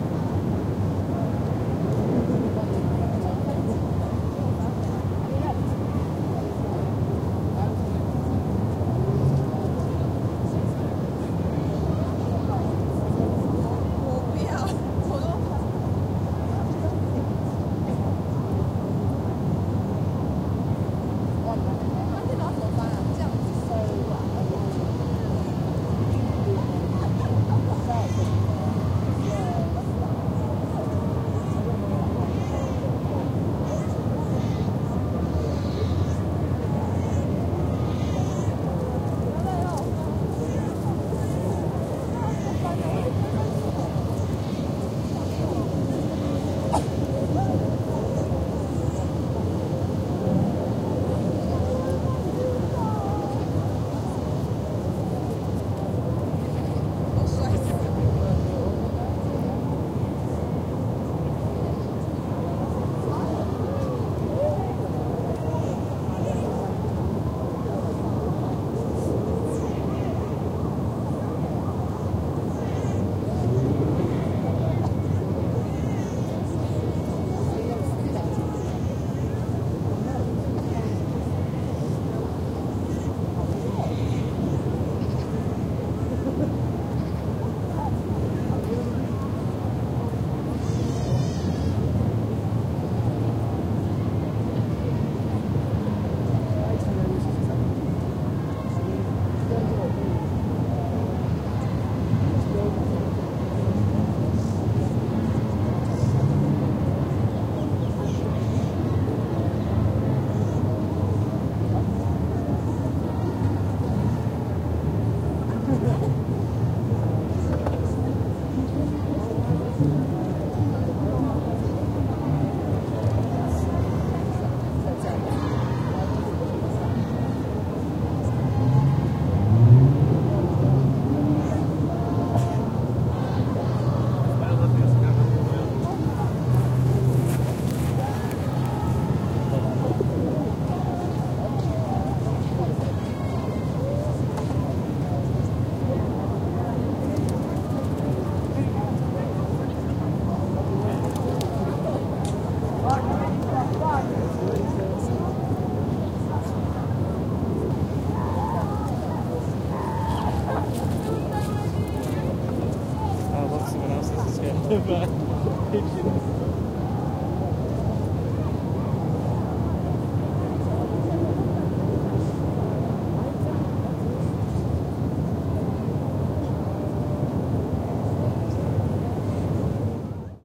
An atmos recording of Green Park, London during the daytime in summer.
If you would like to support me please visit my buymeacoffee page below.
Buy Me A Coffee
Piccadilly, Town, Park, Crowds, Atmosphere, City, Ambience, Green-Park, Westminster, People, Central-London, Tourists, London, Field-Recording, Atmos